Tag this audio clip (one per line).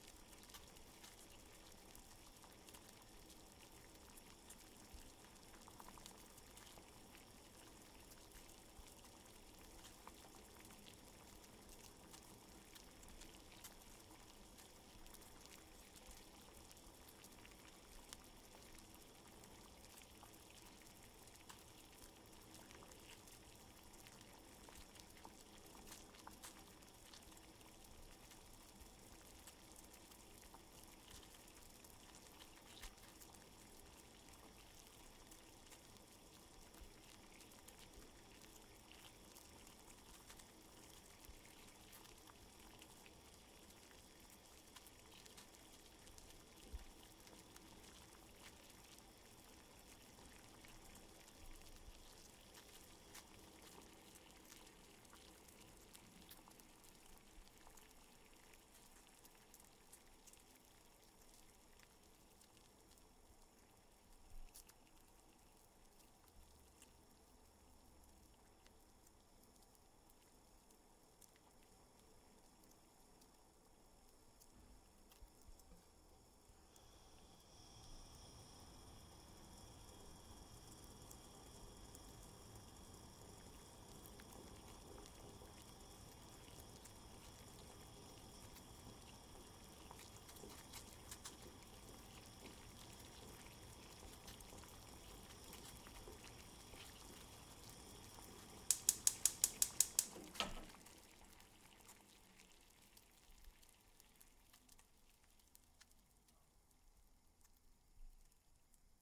boiled
gas
water